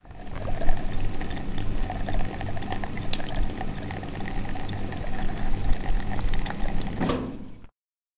Water falling from a fountain from the university.